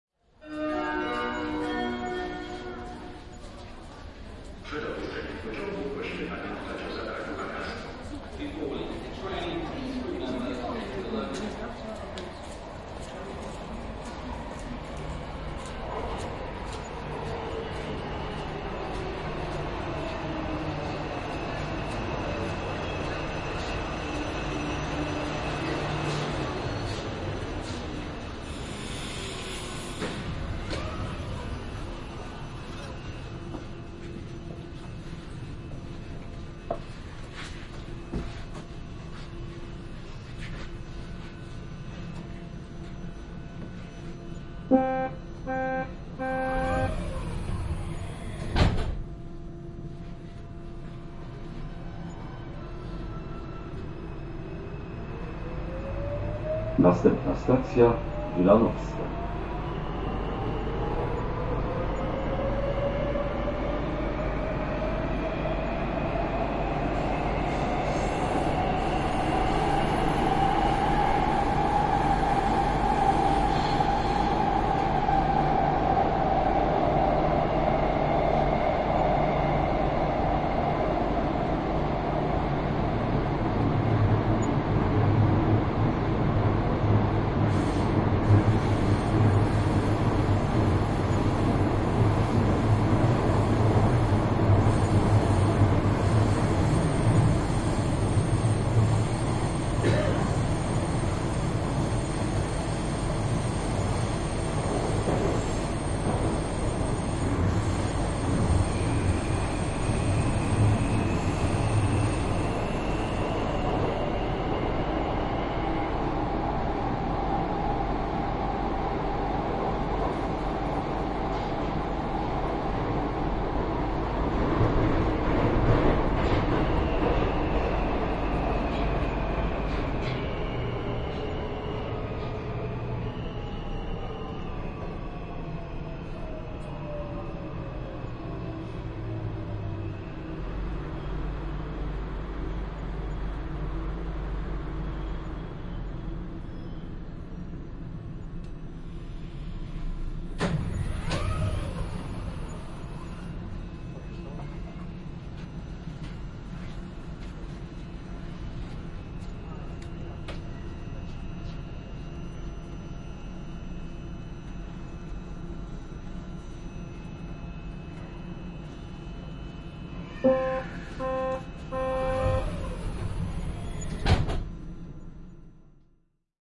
97 excerpt BI WARSAW Metro trip Sluzew-Wilanowska 170215-184423
Recording of a metro ride from Służew to Wilanowska station in Warsaw, Poland.
Binaural recording made with Soundman and Zoom H2n
annoucenemts, binaural, field-recording, metro, poland, ride, train